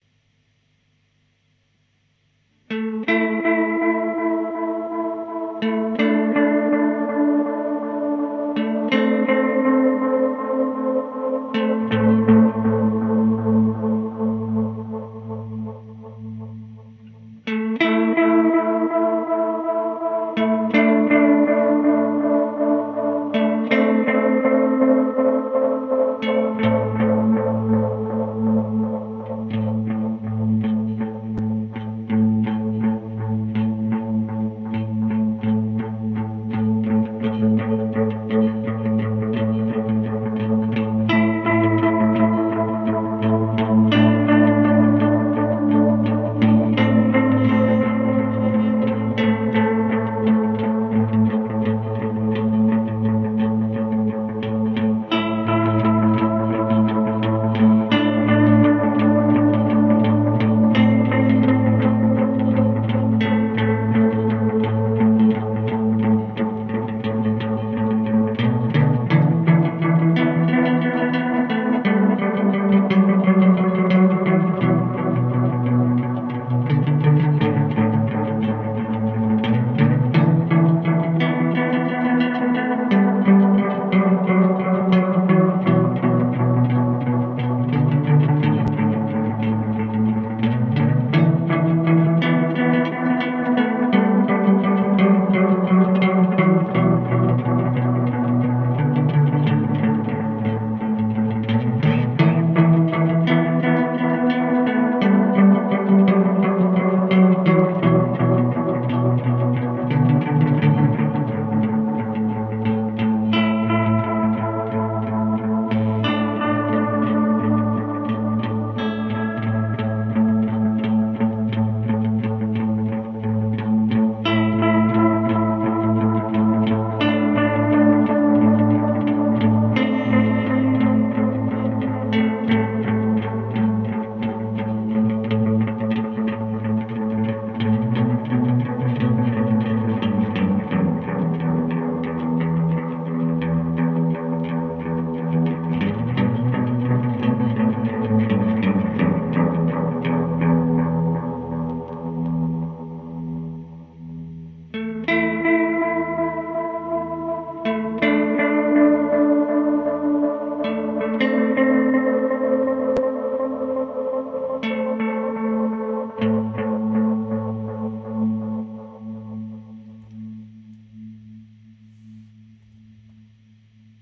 This is qiuet, ambience electroguitar melody, where i played minor meditative motive in clean tone whith using delay (Electro-Harmonix memory toy), reverberation (Electro-Harmonix holy grail plus) and Chorus (Vintage Chorus from Crab Guitar Effect) guitar pedals. Record in Cubase, through "presonus inspire 1394". Melodic.
Ambience, ambient, Chords, chorus, delay, echo, effect, electric-guitar, experimental, guitar, meditative, melodic, minor, motive, music, qiuet, reverb, reverberation, song, sound